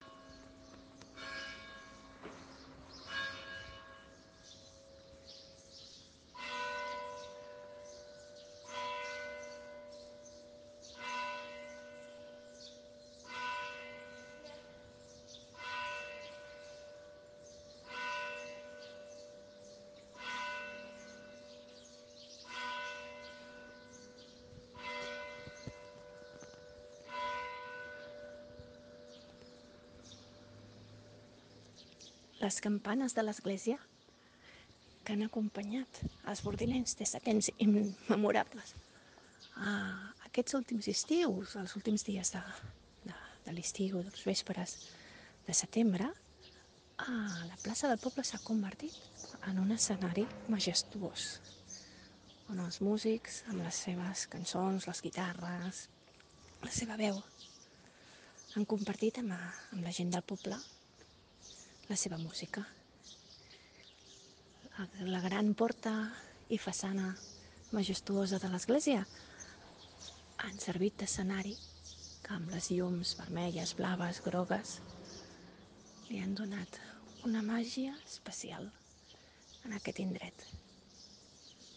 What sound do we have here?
Guacimara Martínez
Record de la Plaça de l'Església
Remembrance of the Church Square
Brossart, Square, Bells, Esglesia, Remembrance, Church, Teacher, Bordils, Campanes